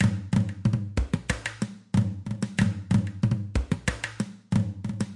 ethnic beat4
congas, ethnic drums, grooves
congas, drums